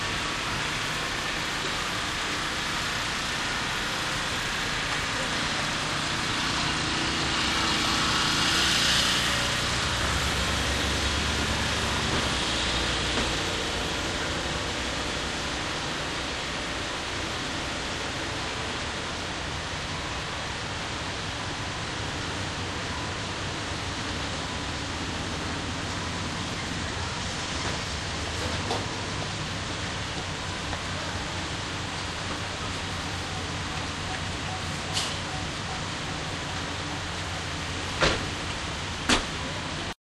southcarolina florencethlcenter
Stopping for gas in Florence South Carolina recorded with DS-40 and edited in Wavosaur.
road-trip, field-recording